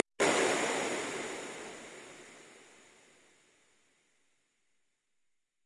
This is a random synthesized click-sound followed by a reverb with 200 ms pre-delay. I used Cubase RoomWorks and RoomWorks SE for the reverb, Synth 1 for the click and various plugins to master the samples a little. Still they sound pretty unprocessed so you can edit them to fit your needs.